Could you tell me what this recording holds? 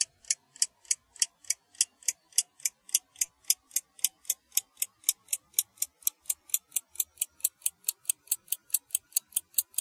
clock tick speed up
hurry, tick, countdown, clock